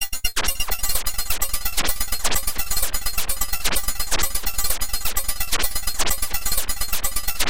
8 seconds of my own beats processed through the excellent LiveCut plug-in by smatelectronix ! Average BPM = 130
beat, bell, cymbal, glitch, idm, livecut, loop, metal, processed